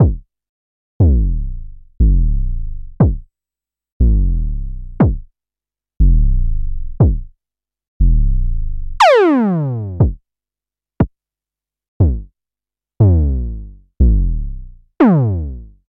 MOOG 32 ANALOG KICK SAMPLE CHAIN x 16
octatrack analog sample chain drum percussion drums